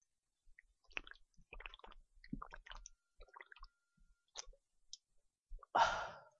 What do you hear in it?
drinking/glugging drink with gasp
drinking water out of a can and gasping. use it in any videos, if you could tell me if your using it that would be great.